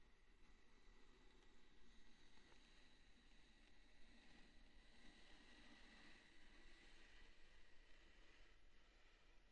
Fregament loopcanto
Scratch between the edge of a block of wood and a table of wood. Studio Recording.